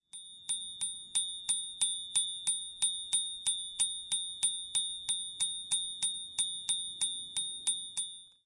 continuous hits of a bike bell